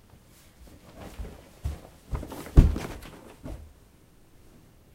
A quick recording of a person running up a double-flight of carpeted stairs. The recording was captured pretty clearly, but the sound seems curiously undefined.